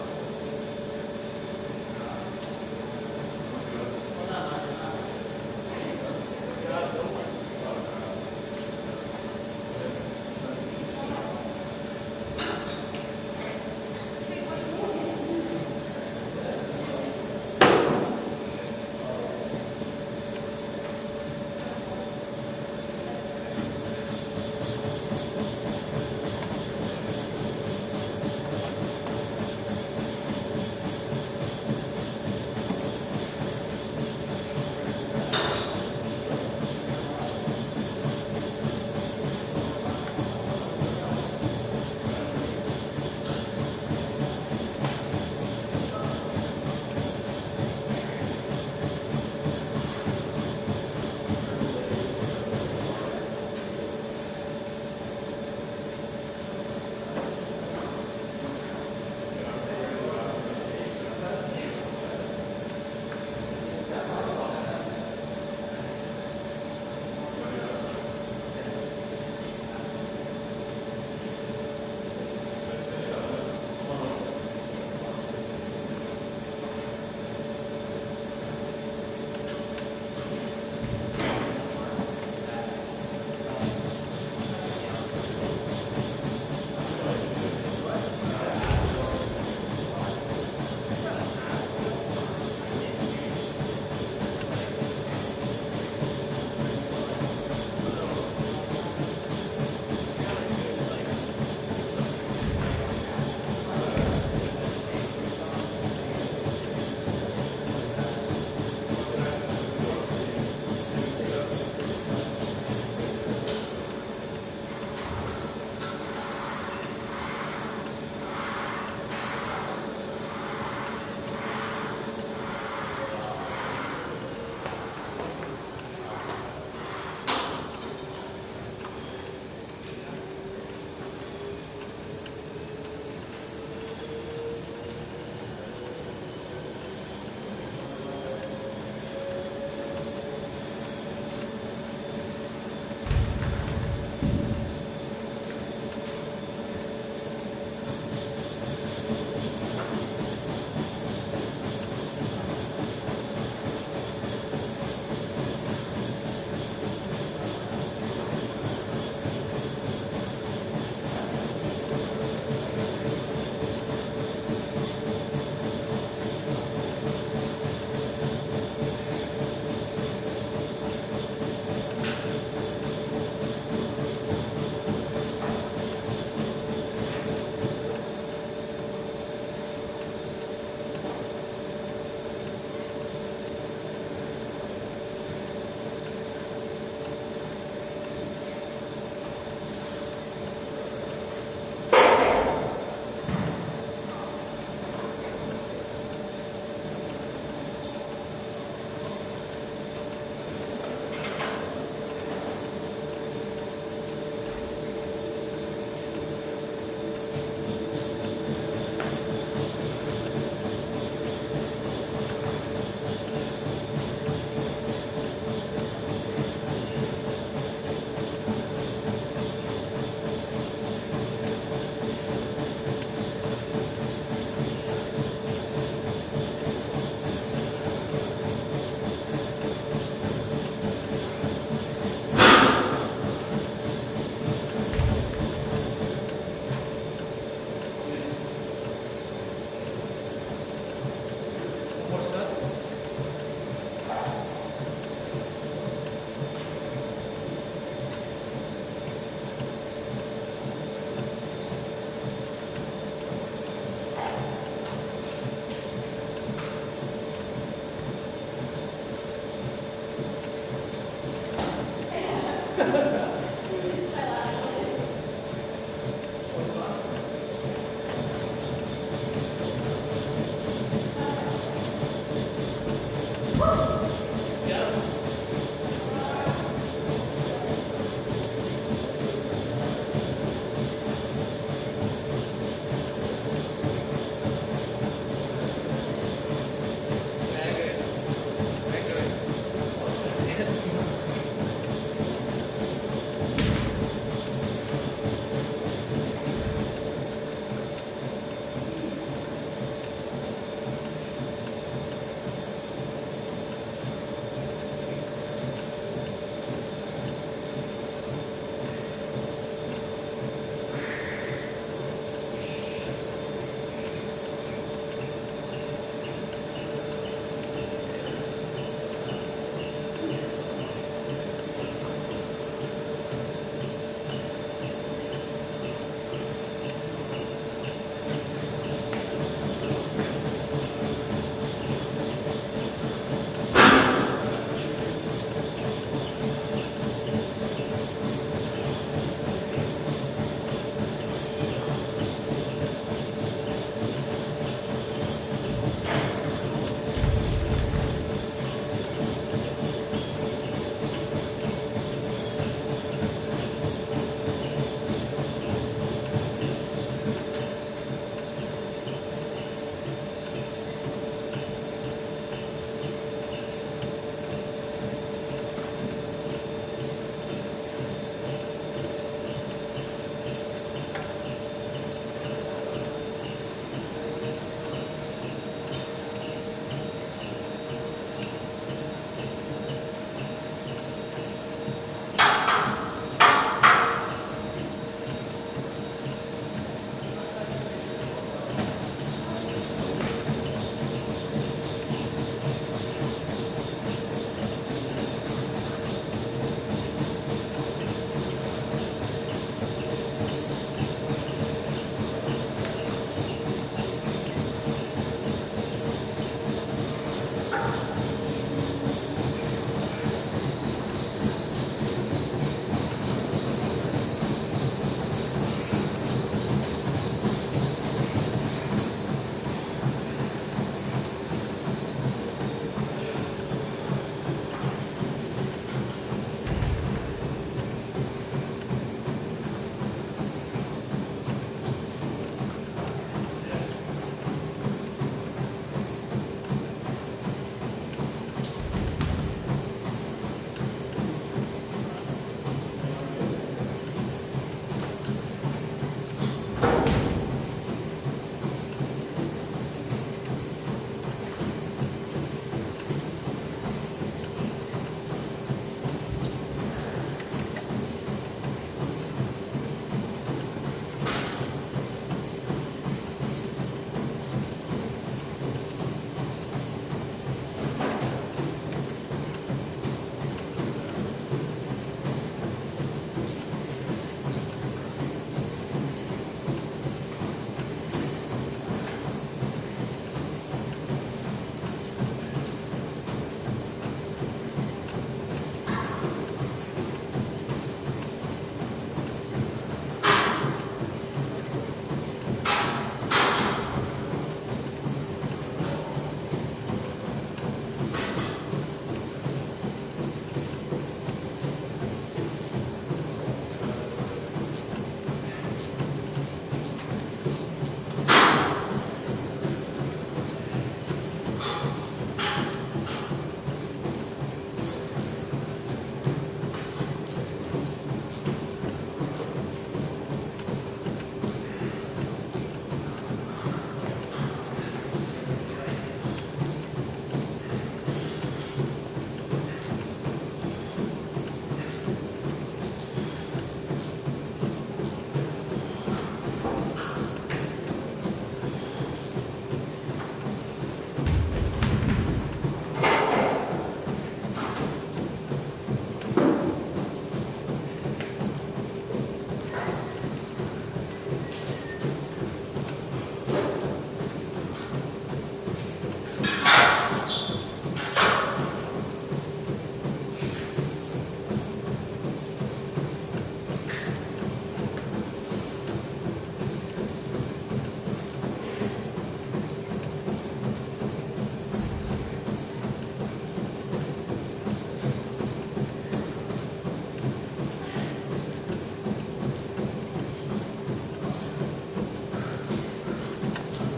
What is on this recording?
Gym ambience -- busy American gym
Zoom H6 XY mic. Treadmills, weights, exercycles, conversation.
ambience, gym, noise